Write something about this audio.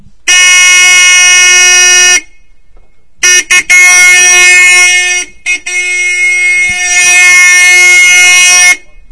MZ ETZ250 motorcycle horn
The horn of an MZ ETZ250 motorcycle
honk, hooter, horn, motorcycle, toot